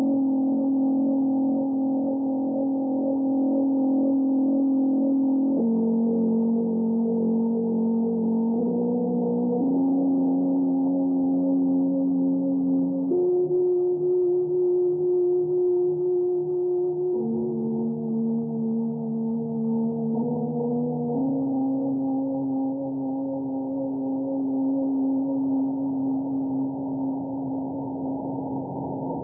Remix of my own sound:
Slowed down, gives a nice atmospheric, sad and cinematic feel.
interval-signal, atmosphere, ambience, cinematic, signal, music, ambiance, guitar, drone, atmospheric, remix, sad, 253698, voice-of-korea, melody, pad, radio, soundscape, dark, ambient, interval, shortwave